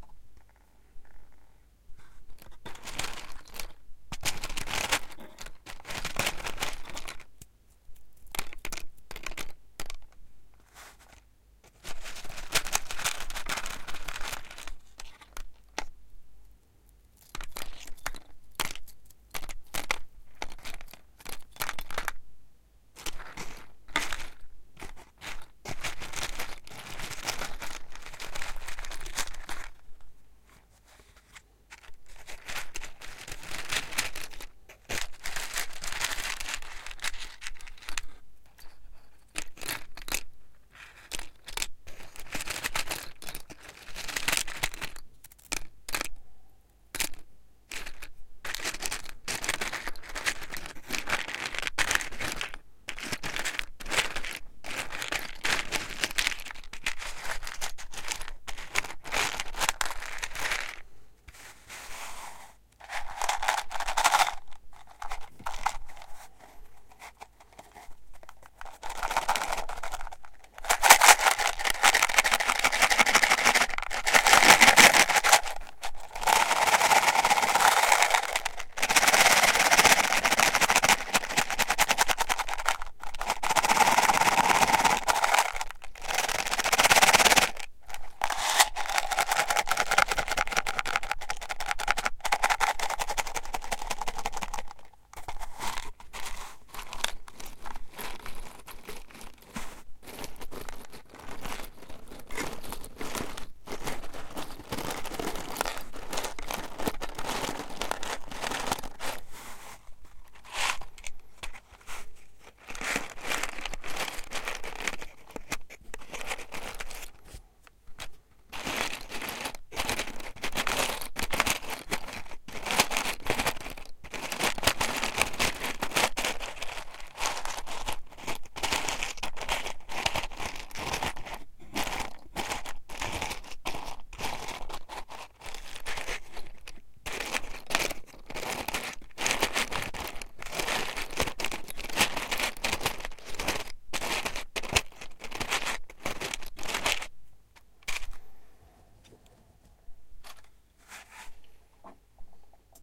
Box of matches. Recorded with Behringer C4 and Focusrite Scarlett 2i2.